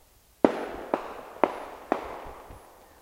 A firecracker recorded on the Fourth of July night.